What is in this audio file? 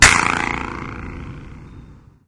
raquetpop dirty
More impulse responses recorded with the DS-40 both direct to hard drive via USB and out in the field and converted and edited in Wavosaur and in Cool Edit 96 for old times sake. Subjects include outdoor racquetball court, glass vases, toy reverb microphone, soda cans, parking garage and a toybox all in various versions edited with and without noise reduction and delay effects, fun for the whole convoluted family. Recorded with a cheap party popper
convolution, free, impulse, impulse-response, ir, reverb